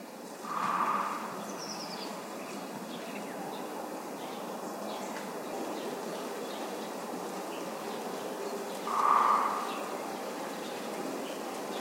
a woodpecker hammers twice
birds, field-recording, forest, nature, south-spain, woodpecker